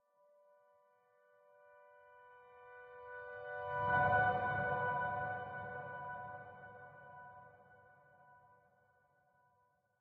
G Major 7 (Piano Reversed Atmospheric Ambience)
Again. This is the second sound file for my atmostphere builder pack. You can get it for free from me. It has great quick shot samples for those who create atmosphere ambient soundtracks.
Steinway D' 9 foot grand. Recorded and Imported into DAW, reversed and added reverb.